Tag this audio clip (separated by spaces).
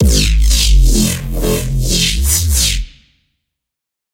Reese Dubstep Drop Electronic Bass Dance